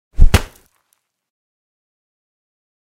Punch In The Effing Face
Slap in tha head
A, whoosh, slap, air